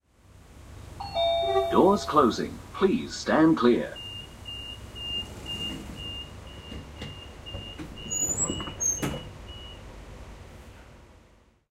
train door close 2a
The sound of an electronic door closing with a warning announcement and beeping on a typical EMU train. Recorded with the Zoom H6 XY Module.